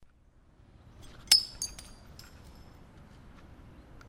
Glass Smash 4
One of the glass hits that I recorded on top of a hill in 2013.
I also uploaded this to the Steam Workshop:
break, crack, breaking, glass, shards, smashing, crunch, shatter, broken, smash, bottle, hit, fracture